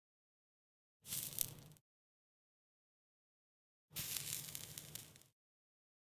01 - Extiguishing a match
Extiguishing a match.